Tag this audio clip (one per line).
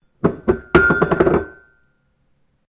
cup
mag